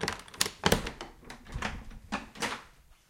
Open latch moving into distance
latch, whir, mechanical